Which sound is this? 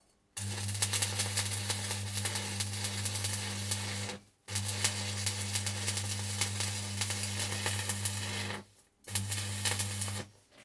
Welding 2 longer bursts
Welding sounds made by welding with the electric current.
Longer bzrsts.
electrode, jump, workshop, welding, weld, electric, spark, noise, work, power, metal, powerup, welder